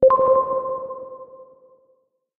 UI Confirmation Alert, B3
Experimenting with the Massive synthesizer, I created some simple synths and played various high pitched notes to emulate a confirmation beep. A dimension expander and delay has been added.
An example of how you might credit is by putting this in the description/credits:
Originally created using the Massive synthesizer and Cubase on 27th September 2017.